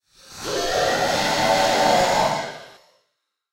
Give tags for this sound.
Monster Scream

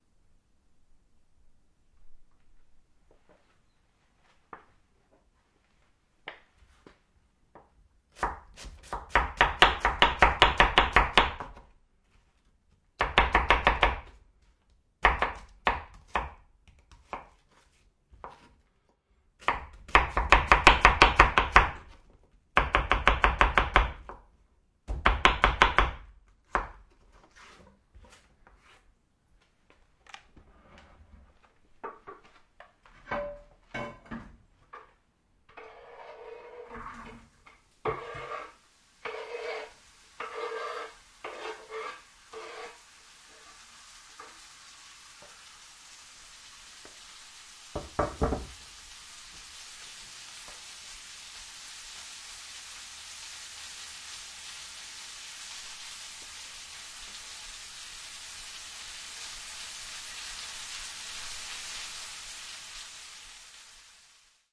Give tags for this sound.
cooking frying xy click vegetable onion pan knife stereo sizzle chopping fry slice

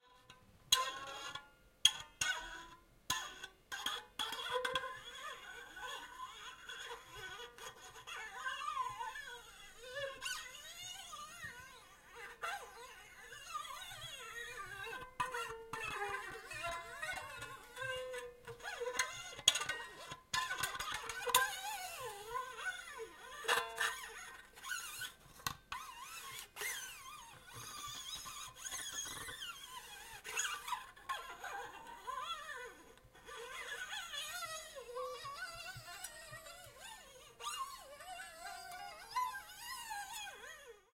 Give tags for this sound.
guitar sliding creak creaking squeak string slide

